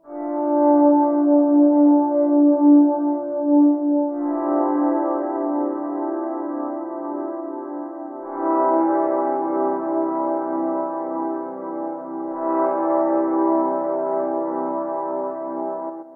Some reverb soaked chords
chords, distant, strumed